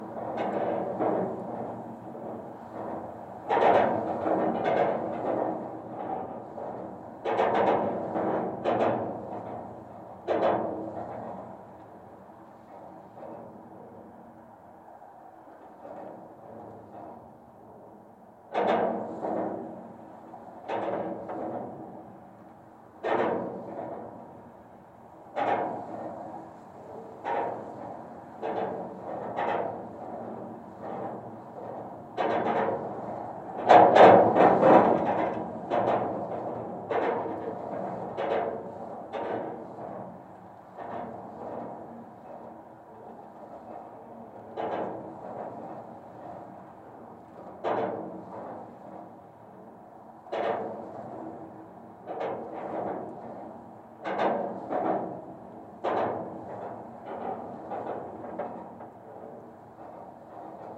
Contact mic recording of the Golden Gate Bridge in San Francisco, CA, USA from the west surface of the east leg of the north tower (Take 02). Recorded October 18, 2009 using a Sony PCM-D50 recorder with Schertler DYN-E-SET wired mic.